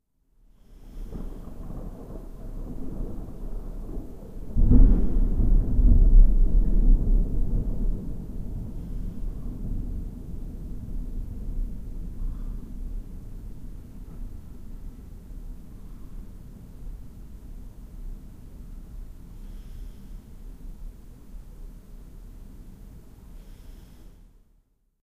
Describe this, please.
One of the 14 thunder that were recorded one night during my sleep as I switched on my Edirol-R09 when I went to bed. This one is quiet close. The other sound is the usual urban noise at night or early in the morning and the continuously pumping waterpumps in the pumping station next to my house.